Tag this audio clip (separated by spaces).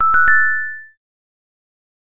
life game object collect